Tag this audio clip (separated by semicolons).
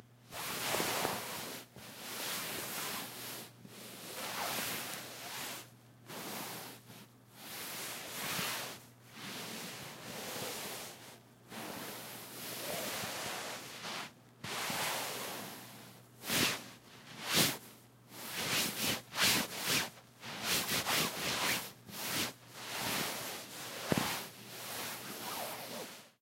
bedsheets; bed; cloth; sheets